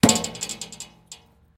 20131202 hand hits street sign ZoomH2nXY
Recording Device: Zoom H2n with xy-capsule
Low-Cut: yes (80Hz)
Normalized to -1dBFS
Location: Leuphana Universität Lüneburg, Cantine Meadow
Lat: 53.2287100511733
Lon: 10.398634672164917
Date: 2013-12-02, 13:00h
Recorded and edited by: Falko Harriehausen
This recording was created in the framework of the seminar "Soundscape Leuphana (WS13/14)".